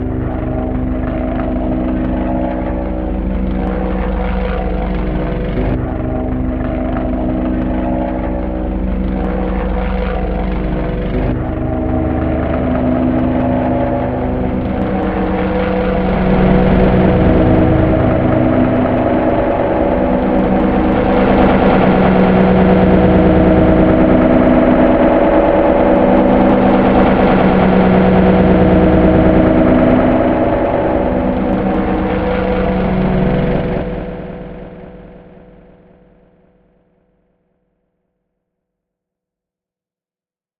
Helicopter flying over house, looped, cellphone mic.
Delay and reverb automated.
Helicopter, Low, Delay